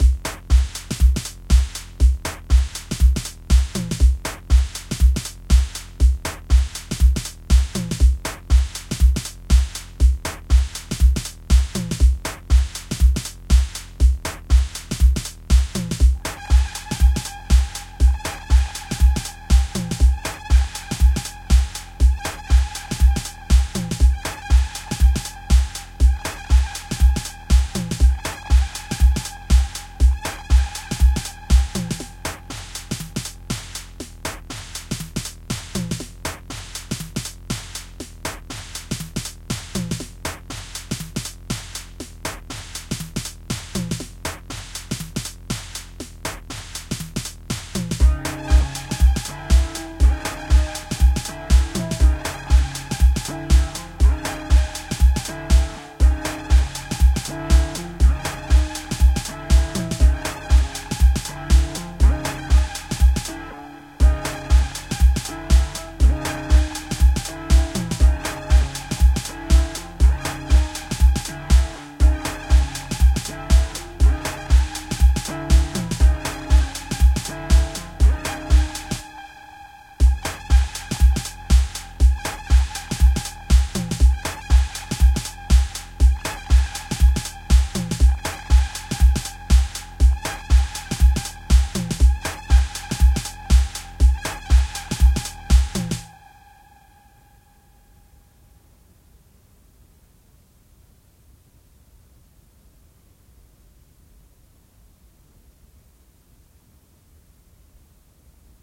House loop patterns combined
Made in FL11, not too complicated to cut and mangle.